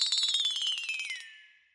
false question answer audio for apps
answer, apps, audio, False, orchestral, question, quiz